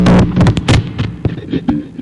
rhythmic noise thing with delay
A random sound from the guitar.
rhythmic, rhythm, noise, amp, effect